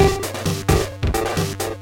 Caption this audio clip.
hesed&tks2(33)
707, loop, bend, beat, modified, drum